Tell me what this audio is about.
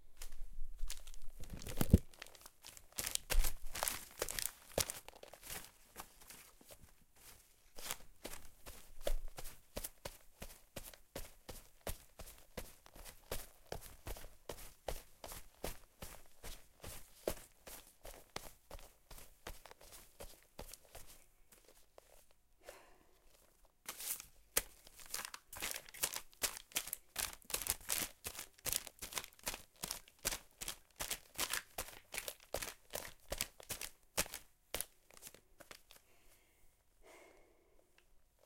run grass
Running through dry grass and leaves